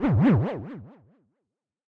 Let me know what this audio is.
A wobbly cartoon / video game effect